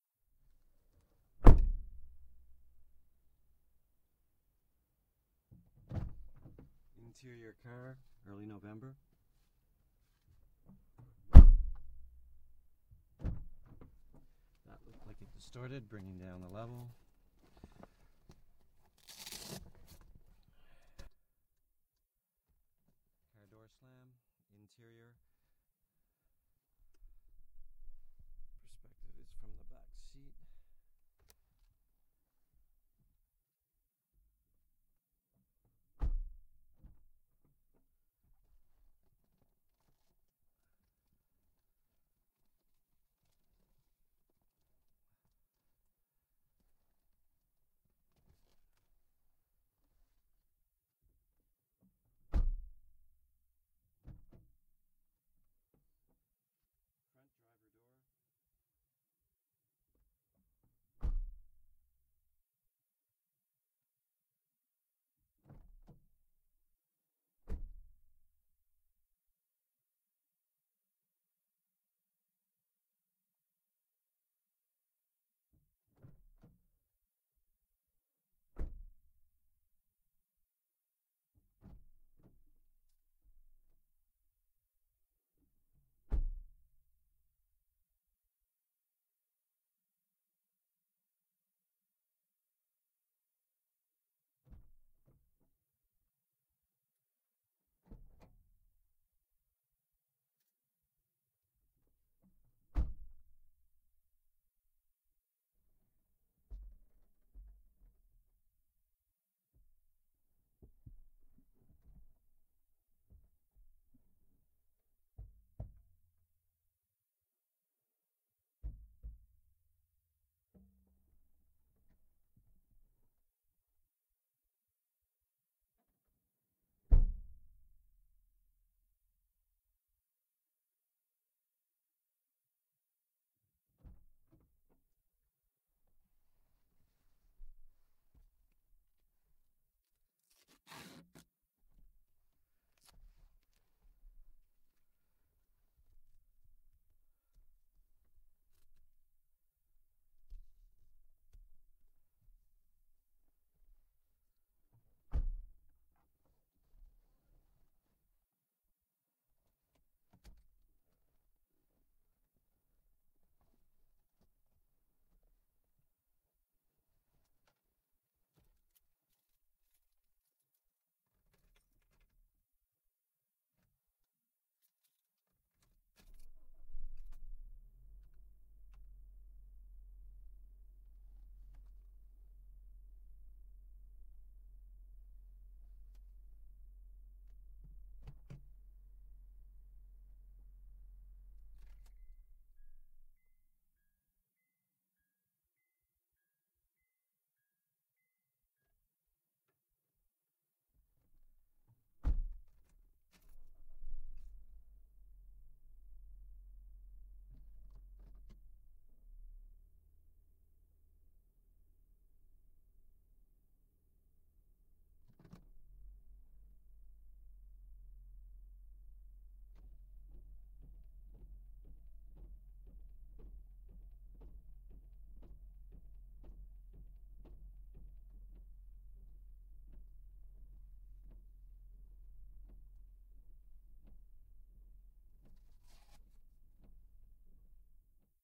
PVO INT CAR doors windows X
Please note to engage END FIRE in decode (the mic was pointed for on Z axis and not compensated for during record). Interior backseat POV. honda civic 2006. alternating opening and shutting doors and windows.
auto, car, door, interior